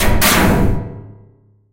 Door Open Heavy
Synthesized Door Sound.
shutting, sounddesign, effect, sound